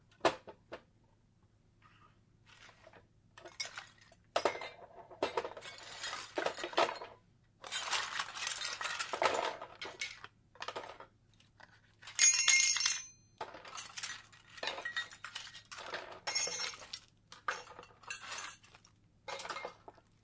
crash03 cleaning up mess
When you drop things, you need to clean them up. Putting various small items in a box. Needed some elements for a guy crashing into some junk.
Accidentally had phonograph potted up on mixer - 60 cycle hum and hiss may be present. Used noise reduction to reduce some of this.
soundeffect, crash